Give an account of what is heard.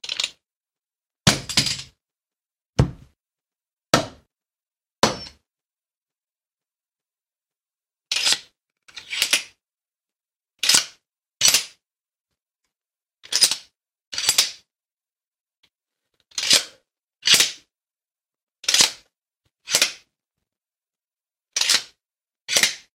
Expandable Baton Sounds
Recorded sounds of an expandable baton. An expandable baton is a baton with a metal rod that can slide in and out of the inside of the handle.
Miscellaneous sounds at the beginning (in order)
1. Lightly shaking the baton
2. Dropping the baton
3. Hitting a pillow with the baton
4. Hitting wood with the baton (the side of my bunk bed)
5. Hitting the ground with the baton
The rest of the sounds (12) are just
expanding the baton and closing the baton.
wood, metal, pillow, melee, hitting, sliding, drop, security, police, hit, thud, no-background-noise, police-weapon, baton, thuds, thump, weapon, impact, expandable-baton, beating